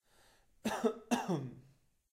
Man coughs. Recorded from Zoom H2. Audacity: normalize and fade-in/fade-out applied.
sick, man, cold, allergy, cough, UPF-CS12